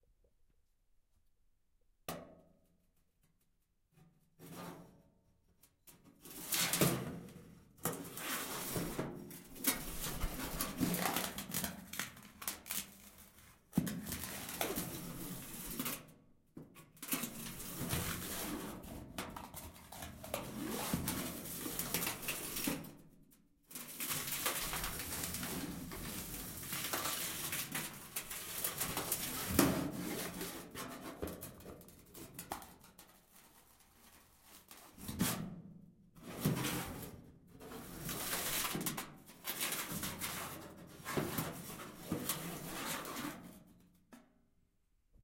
Grinding with a beer bottle in a steel sink